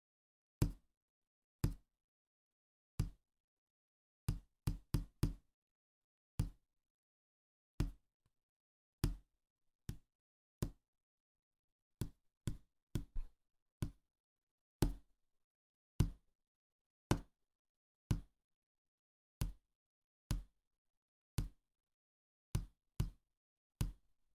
finger tap table counter wood various
counter, finger, table, tap, wood